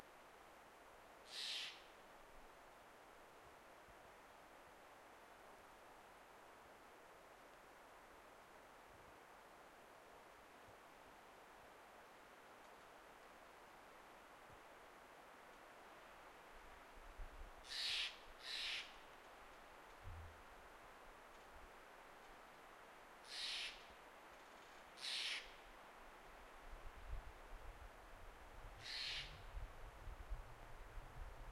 Some sort of raptor bird screeching at something in the forest.Recorded in Badger Creek Wilderness with Zoom H4 on-board mics with some minimal noise reduction.
squawk, field-recording, forest, wind, bird, wilderness, screech